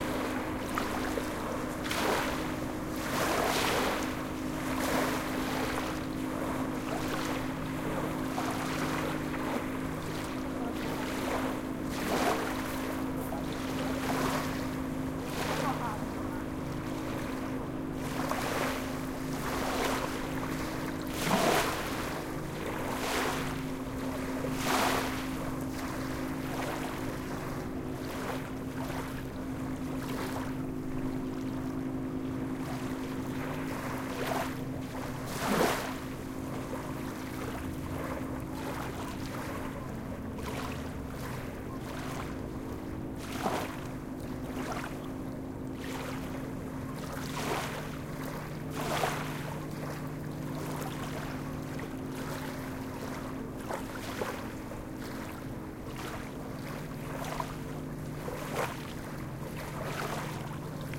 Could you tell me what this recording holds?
Water wave Beach Peoples ships Field-recording
Recorded Tascam DR-05X
Edited: Adobe + FXs + Mastered